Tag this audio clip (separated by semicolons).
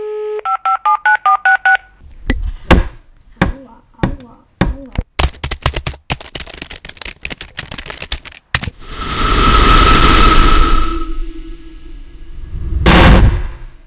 Essen; January2013; SonicPostcards